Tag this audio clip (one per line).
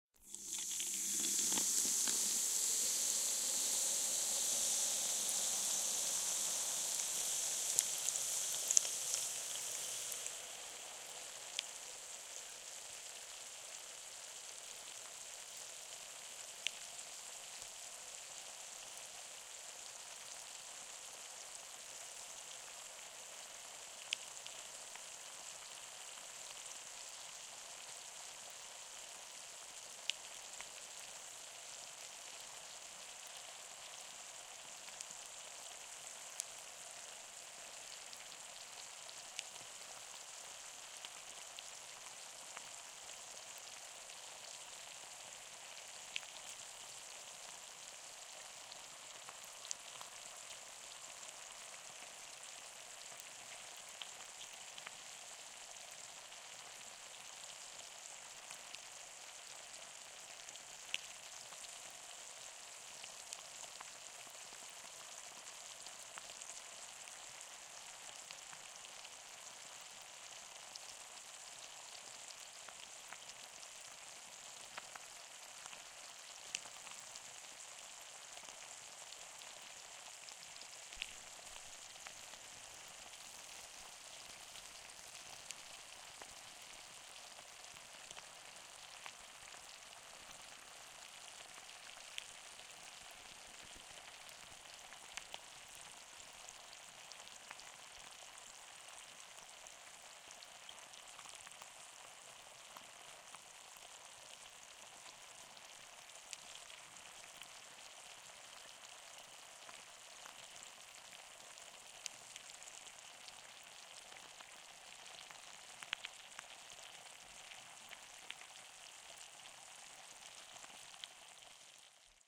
fizzy-drink
carbonation
cola
drink